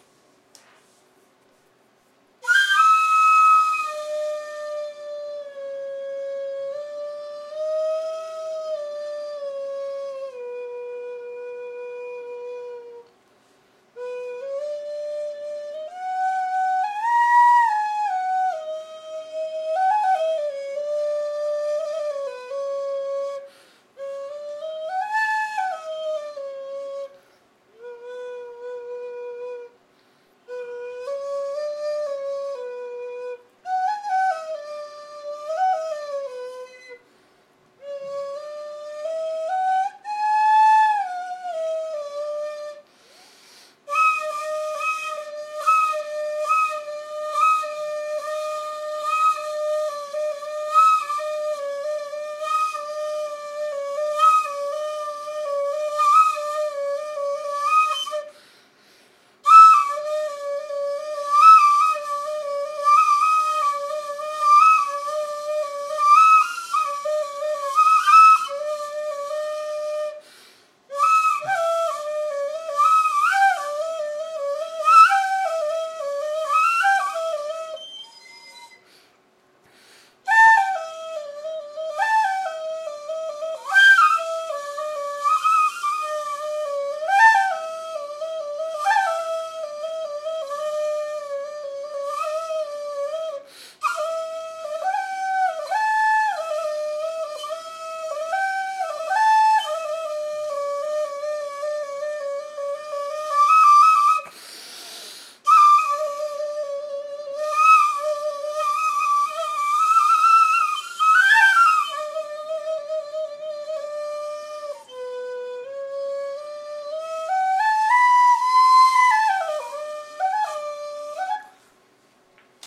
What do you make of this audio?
I recorded this Indian bamboo flute as a part of a sound check.